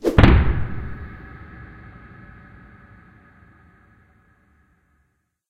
Epic Logo Intro part 1: Impact and Gas
Made for this request in Audacity. .aup available here.
Something moves fast and then explodes and a voice gas sound remains.
Part of what can be seen in:
audacity, breaking, destruction, device, effect, epic, float, gas, haunted, horror, intro, logo, mixed, scary, showdown